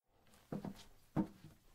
Single Hardwood Boots
A person walking on a hardwood floor with boots.
boots, female, footsteps, hardwood, male